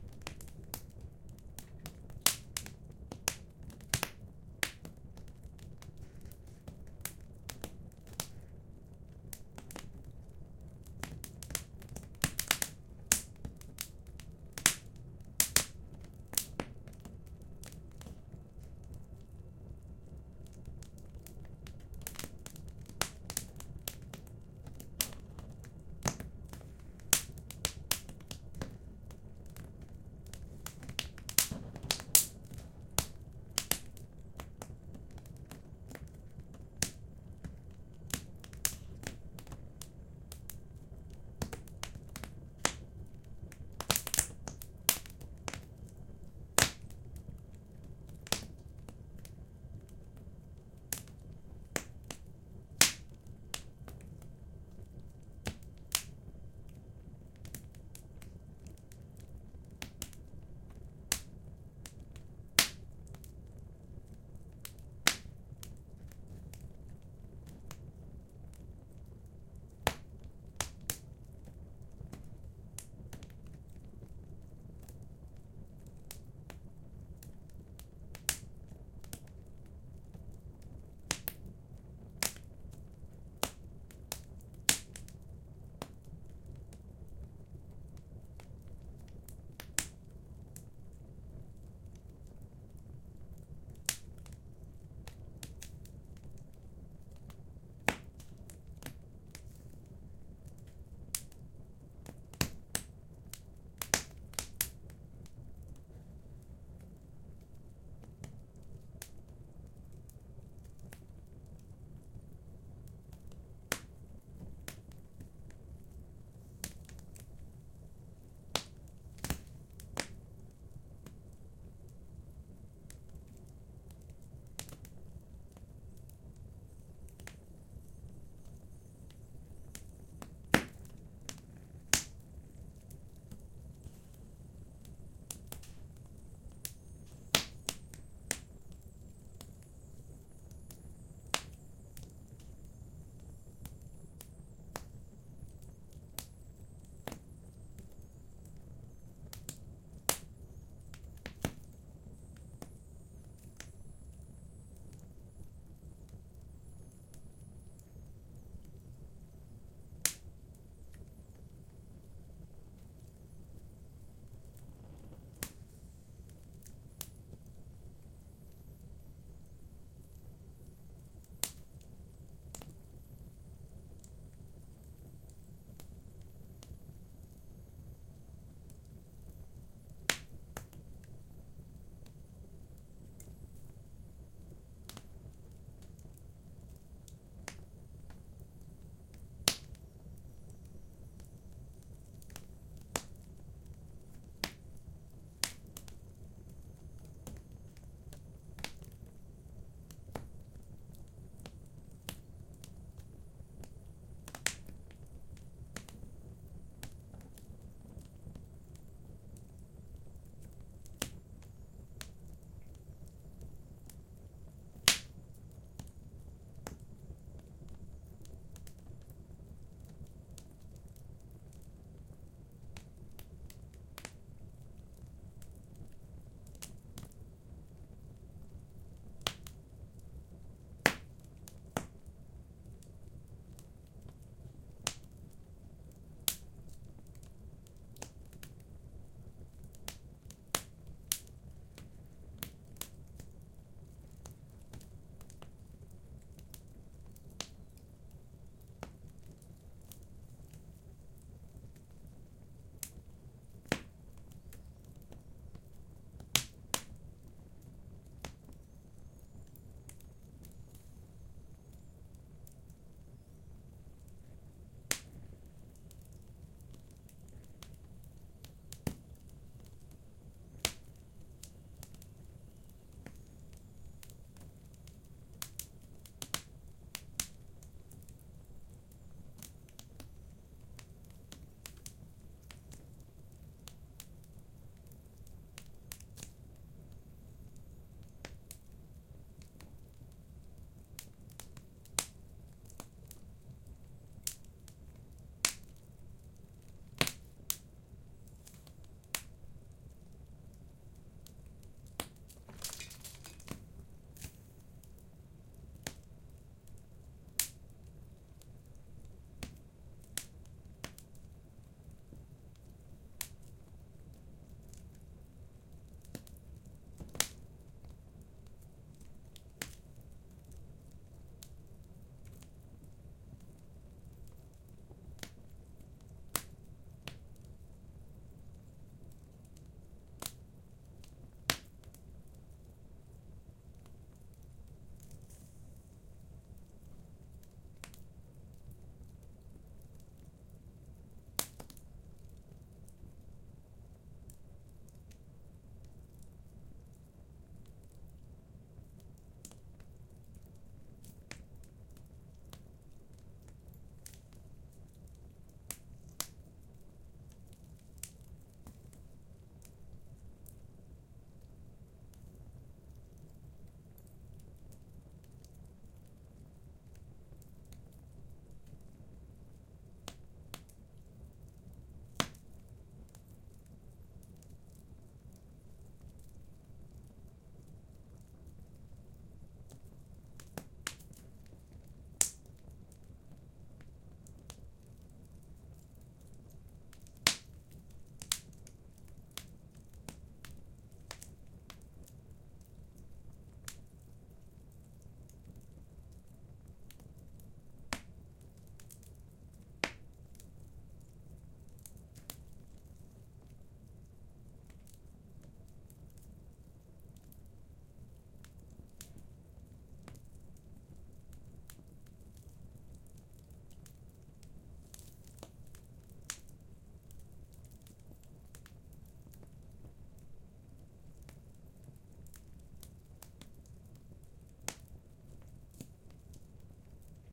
Another recording of our fireplace. AT3031 microphones into FR-2LE (Oade) recorder.
field-recording, fire, fireplace, flames